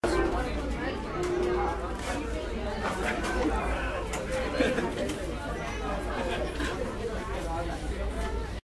Background Noises - This is the sound of background noise in a restaurant.